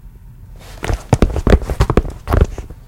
walking footsteps tennis shoes tile floor 5
A man walking on tile floor in tennis shoes. Made with my hands inside shoes in my basement.
floor, footsteps, male, walking